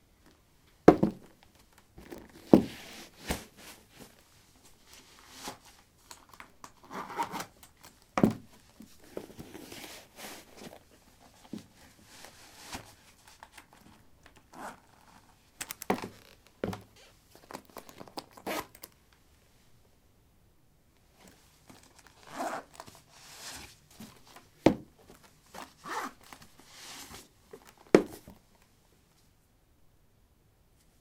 wood 17d boots onoff
Putting boots on/off on a wooden floor. Recorded with a ZOOM H2 in a basement of a house: a large wooden table placed on a carpet over concrete. Normalized with Audacity.
footstep, footsteps, steps